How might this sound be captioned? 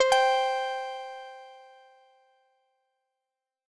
Simple Synth Jingle
A simple notification/jingle sound made with a synth plugin.
8-bit, arcade, chip, chippy, chiptune, jingle, retro, videogame